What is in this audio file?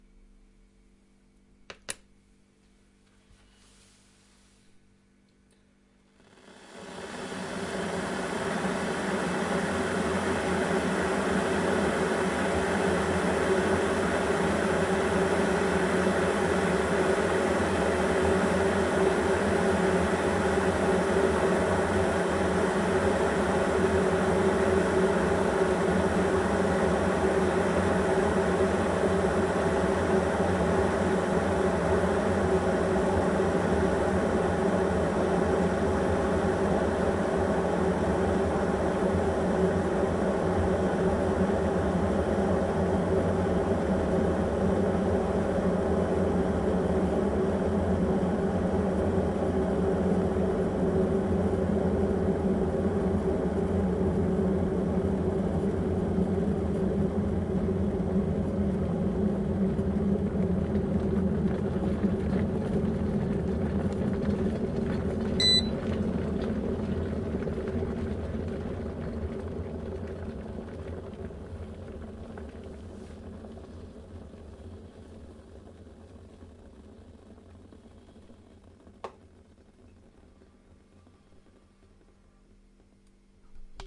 kettle boiling, simple
boiling, coffee, kettle, tea